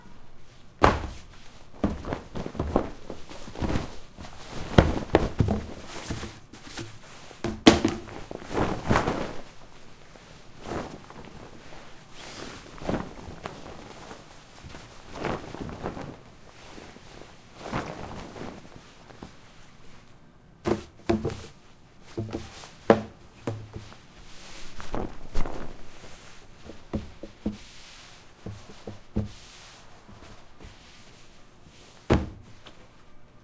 Seamstress' Studio Fabric Roll and Handling
Recorded at Suzana's lovely studio, her machines and miscellaneous sounds from her workspace.
Fabric, Handling, Roll, Seamstress, Studio